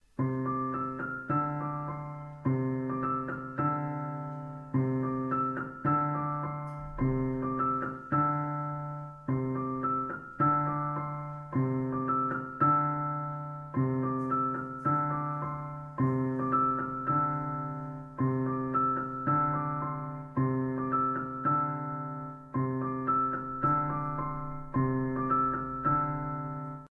background music
mixture, music, piano